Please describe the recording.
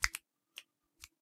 two fingers flip four times in a stereo sound
flip, flipping